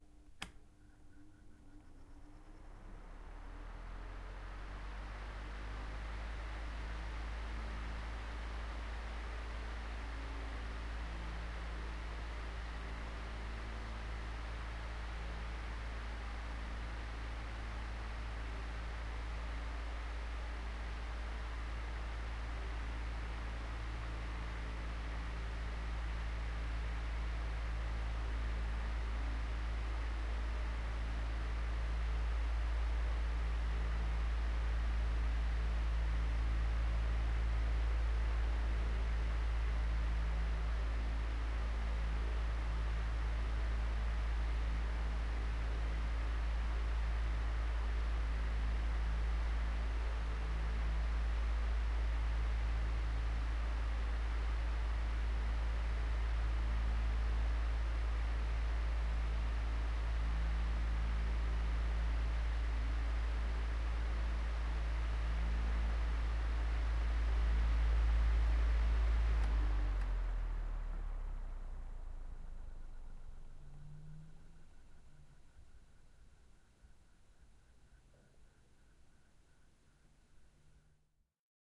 Fan, setting 2
A fan blowing, set to the middle setting. The recording was made from behind the fan so the air wouldn't blow into the mic directly.
ac, air, air-conditioning, blowing, fan, Fans, foley, vent, ventilation, ventilator, wind